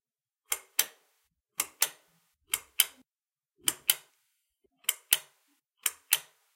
String-pull-lightswitch-severaltakes
A stereo recording of a string/cord-pull lightswitch being switched on and off several times
button, click, cord-switch, light-switch, press, short, stereo, string-pull, switch